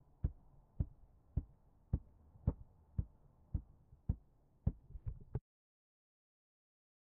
running soft ground
footsteps running on soft ground
foot, footsteps, running